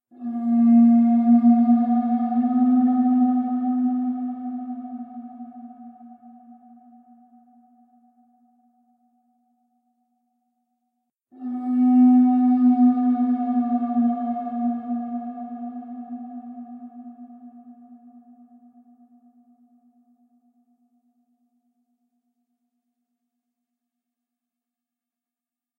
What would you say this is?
scary conch shell
alpenhorn, clarion, conch, conch-shell, creepy, dark, eerie, hades, haunted, hell, horn, horror, mountain, pyrenees, scary, swiss